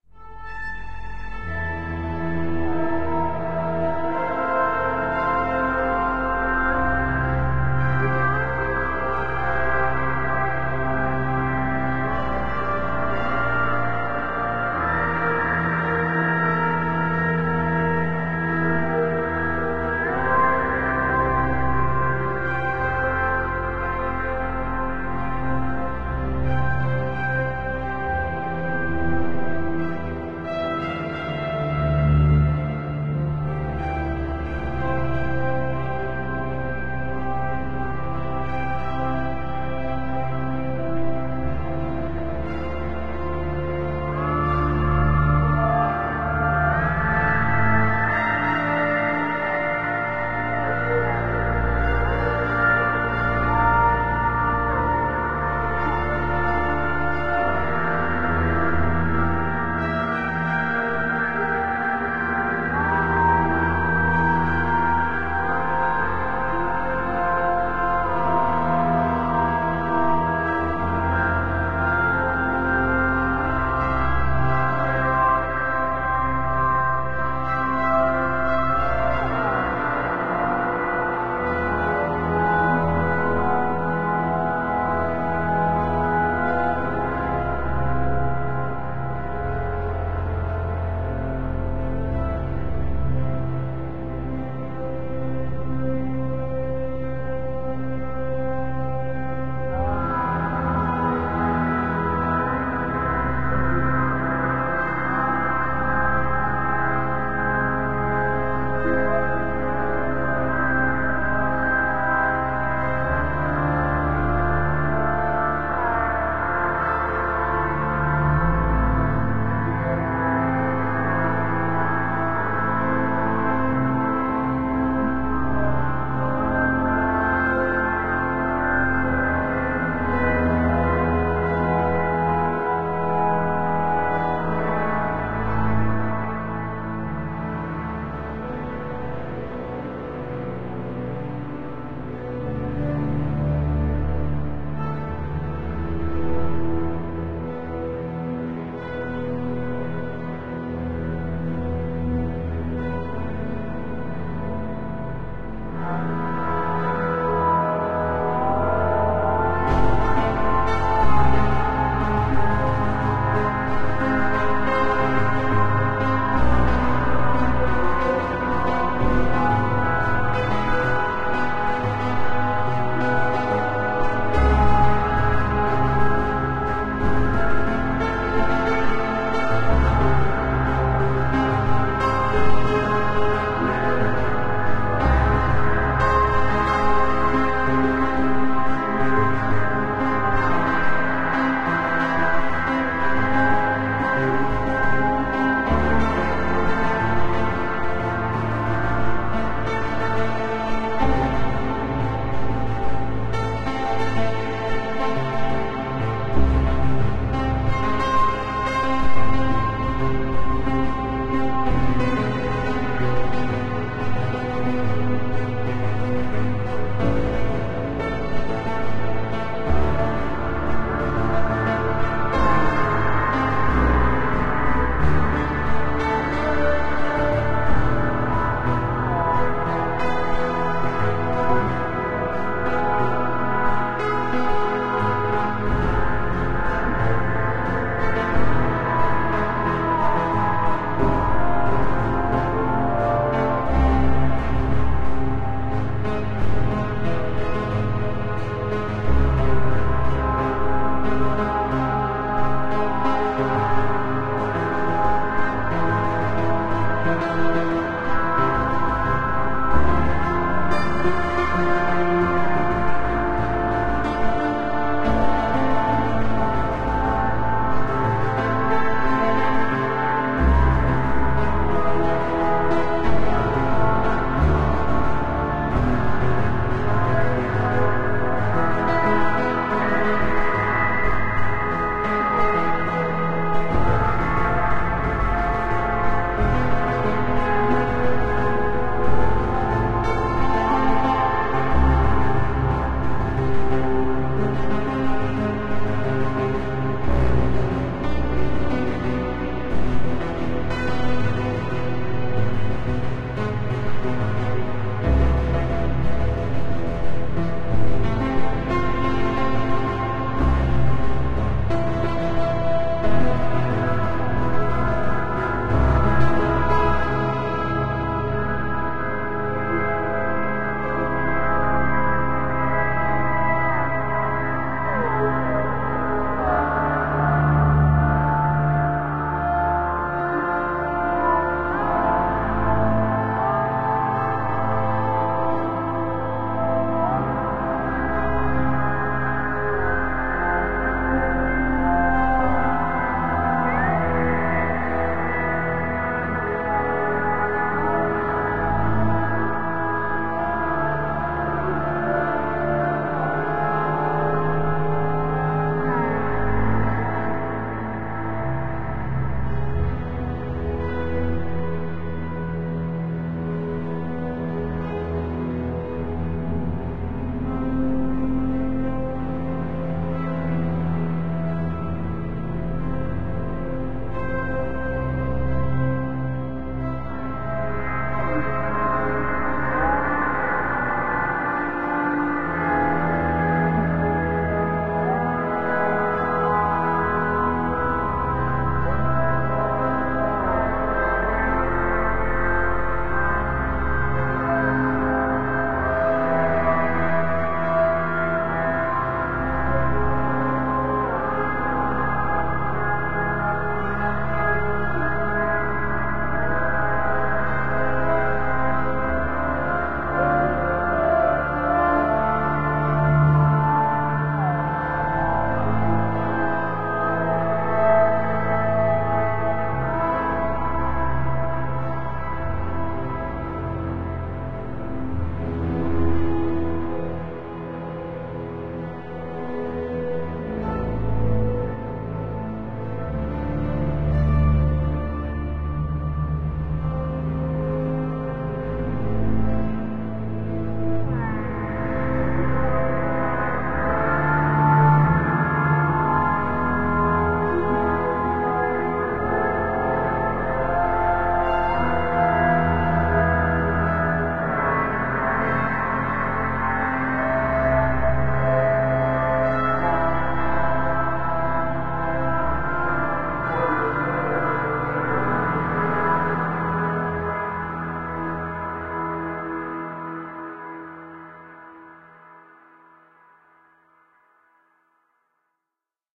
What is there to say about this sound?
Similitude of a few singers and unidentifiable instruments performing an odd tune. Compared to earlier uploads, this one adds something akin to bowed double bass and maybe a bit of violin here and there, has a cleaner reverb, etc., and it is much more busy in terms of movement, even strangely so. While being more dramatic/cinematic, even so the key changes unpredictably, and the "choral voices" sometimes sound more alien than human. This is output from an Analog Box circuit I built (none of the ChoirBotOutput excerpts are driven by human interaction, except to the degree that I might flip a switch to let it settle down so I can get a clean ending, or that sort of thing). This isn't likely to all that useful to anyone except perhaps as musical inspiration, and of course all of them demonstrate just how amazing Analog Box really is (even the reverb is implemented as a sub-circuit in Analog Box).
choral, voices, abox, strings, music, synthetic